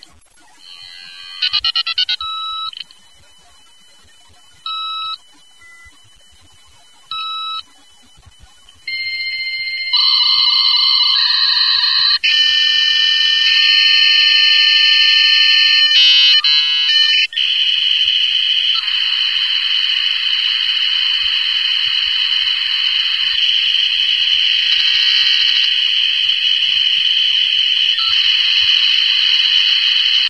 The sound of my old modem...now I thank God for cable.
internet, connection, phone, modem, dial-up